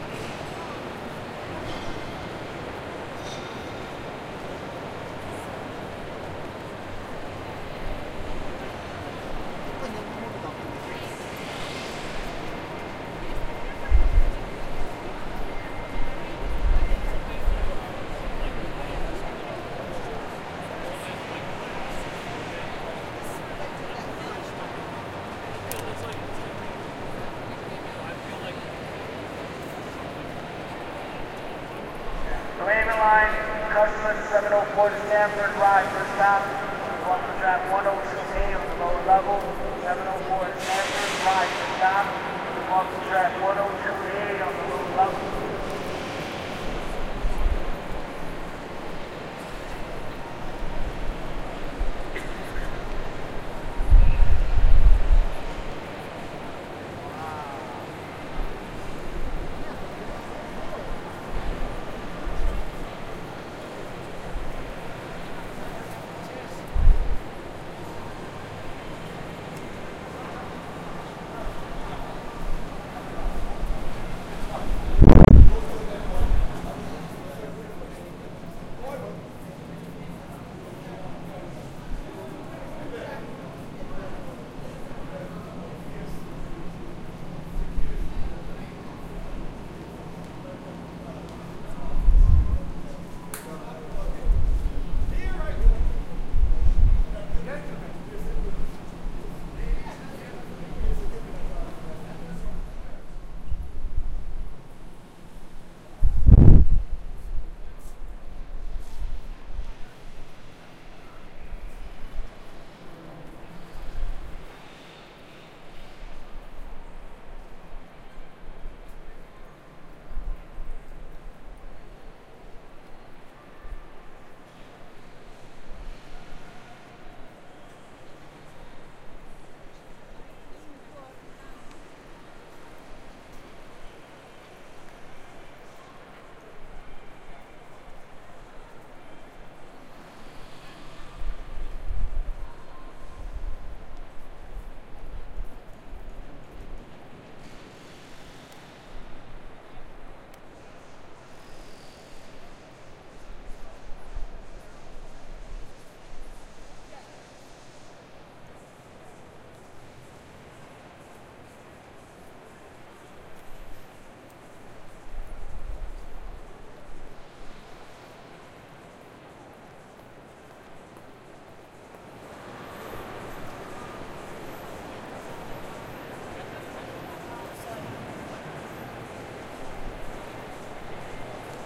Grand Central Station, New York